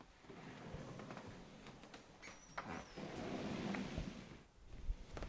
Slide and creak